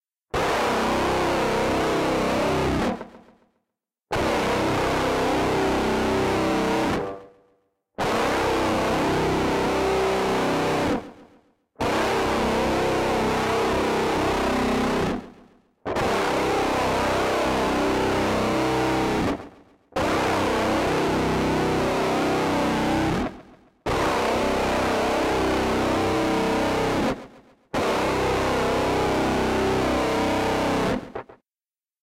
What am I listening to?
loud growling guitar. I get an arrival/announcement feel from it. Made with a Line6 POD with many internal effects added. no amps just distortion pedal, tube screamer. removed speaker cabinets from sound set up. has a growler (modular) pedal. all done through POD HD500
industrial, scary, heavy